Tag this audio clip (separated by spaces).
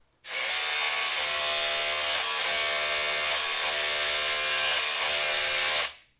Tool caulking workshop hammer work electric noise tools machine werkzeug